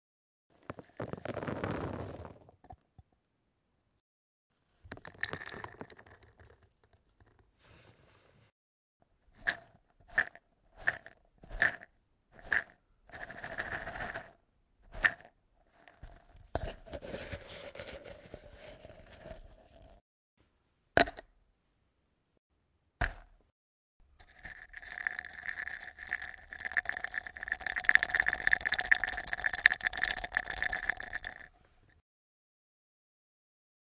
filtros en vaso plastico
close-up, filters, filtros, glass, plastic, plastico, vaso